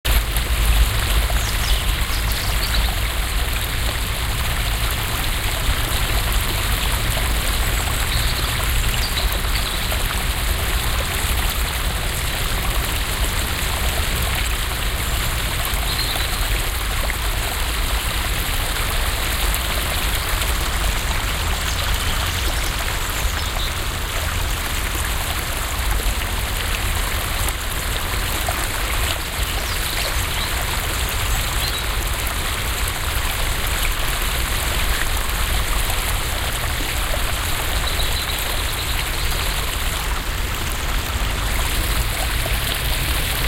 Regents Park - Water falls into stream
ambiance, ambience, ambient, atmosphere, background-sound, city, field-recording, general-noise, london, soundscape